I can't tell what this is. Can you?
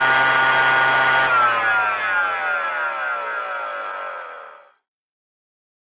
delphis FX MACHINE 1
Selfmade record sounds @ Home and edit with WaveLab6
household, fx, machine